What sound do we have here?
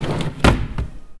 printer sound stomp